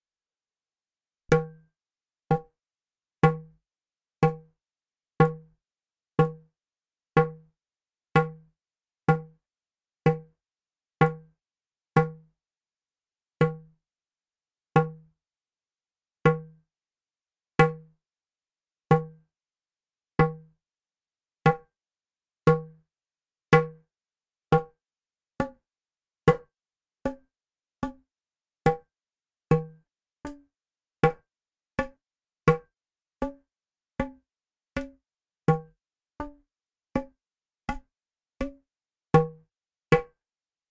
Steel Tube Strikes
A mono recording of a steel tube being struck by hand at one end, the other end pointing towards the mic.